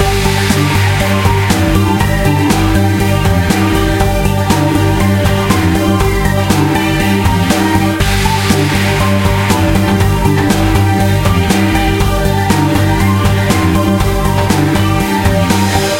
Loop Casual Space Exploration 09
A music loop to be used in fast paced games with tons of action for creating an adrenaline rush and somewhat adaptive musical experience.
game, Video-Game, war, music-loop, victory, videogame, music, indiegamedev, games, gamedev, indiedev, gaming, gamedeveloping, loop, videogames, battle